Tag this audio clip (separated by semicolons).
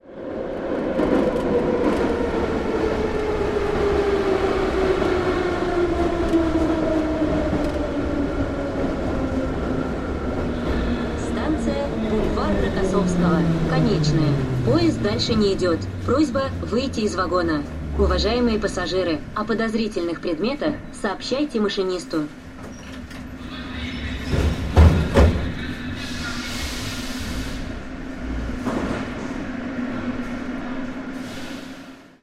Locomotive; Metro; Moscow; Subway; train; Transport; Transportation; Travel; underground